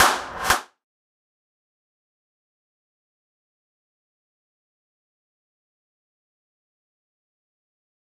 Clap 2 - Ultra Reverse Reverb
This is a record from our radio-station inside the rooms and we´ve recorded with a zoomH2.